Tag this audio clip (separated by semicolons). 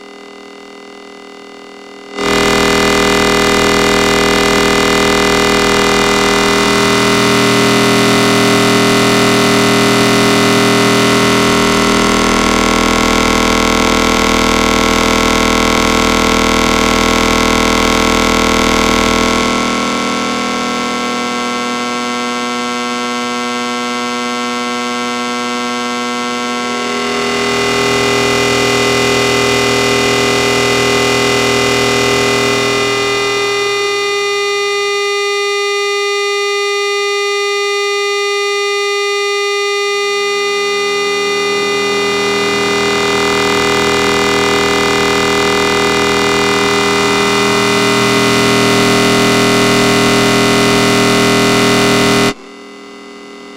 Kulturfabrik; Synthesizer